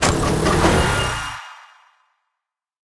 UI, Mechanical, Turning-On, 04, FX
An artificially designed user interface sound with a mechanical aesthetic from my "UI Mechanical" sound library. It was created from various combinations of switches, levers, buttons, machines, printers and other mechanical tools.
An example of how you might credit is by putting this in the description/credits:
And for more awesome sounds, do please check out the full library or SFX store.
The sound was recorded using a "Zoom H6 (XY) recorder" and created in Cubase in January 2019.